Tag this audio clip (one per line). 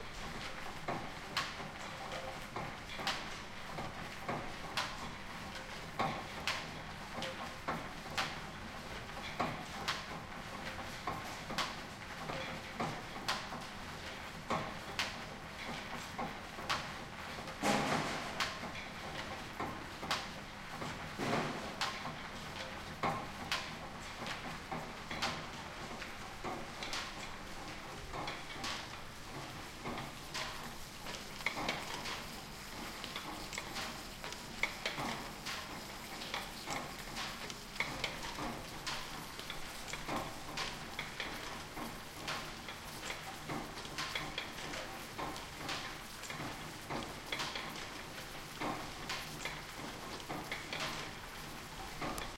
industrial
idling
belts
textile
field-recording
factory
power
pulleys
drive
line-shafts
weaving